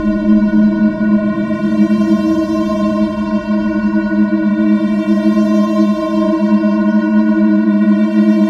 CharlotteRousseau 2013 2014 windinachurch
I used 2 tracks : sinusoidal 320 Hz and square 210 Hz.I used wahwah.
Then, I used reverb. The effect is named " reverb in the lobby of a church".
Finally, I stretched the tracks.
church, reverb, void, wind